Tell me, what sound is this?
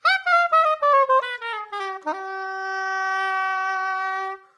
Non-sense sax played like a toy. Recorded mono with dynamic mic over the right hand.